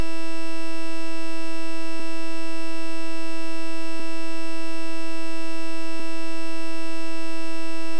Sample I using a Monotron.